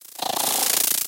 Wings of insects
Sound of an insect. Synthesized in "Reaper" from a noise generator and LFO modulation. Can be used as a character's sound in a video game ;)
sounddesign, Wings, videogames, Insects, game, Bee, bugs